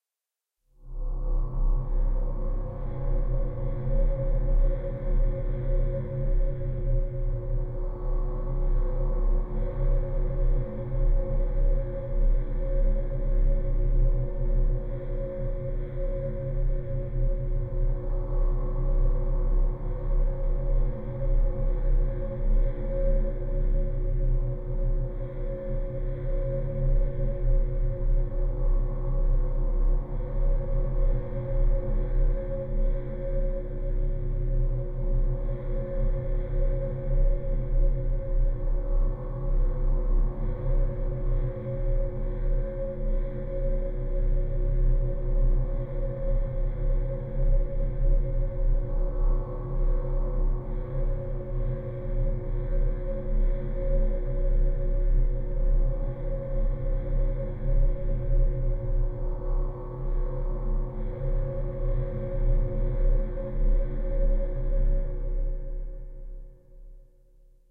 cine background1
made with vst instruments